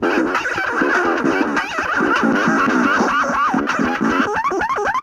Riff Malfunction 06
Glitched riff from a circuit bent toy guitar
Circuit-Bending, Circuit-Bent, Malfunction, Glitch